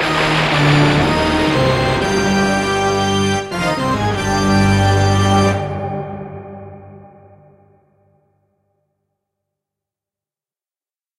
FX evil sting
An orchestral music sting with thunder, for the appearance of a villain or evil threat. Music composed and played on a Korg Krome. The thunder component is similar to the old classic BBC taped thunder effect. Mastered with Adobe Audition CS6.
evil, music-sting